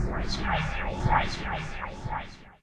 Ghost whisper 1
I recorded myself making some ghostly noises and put them through audacity. Ideal for making that tense moment in your horror game or movie
creepy, disturbing, ghostly, scary